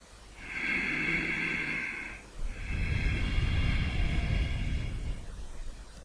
Male breathing
slow breathing male